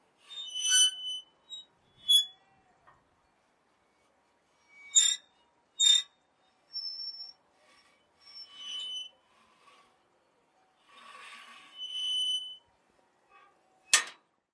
Date: Feb. 24, 2013
This is the sound of the gate of a chapel called 'Ermita de las Angustias' in Alanis (Sevilla, Spain).
Gear: Zoom H4N, windscreen
Fecha: 24 de febrero de 2013
Este es el sonido de la cancela de una ermita llamada "Ermita de las Angustias" en Alanís (Sevilla, España).
Equipo: Zoom H4N, antiviento